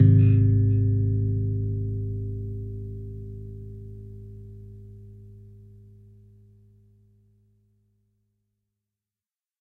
G#maj BassChord 100bpm
G# Major Bass Chord